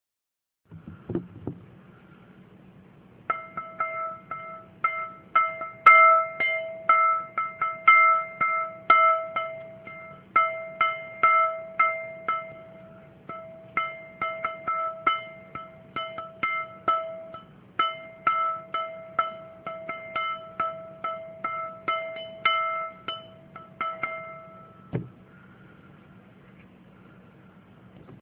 More china bowl
China bowl sample, me thumping the side with my finger. recorded on cell phone.
bowl, china, field-recording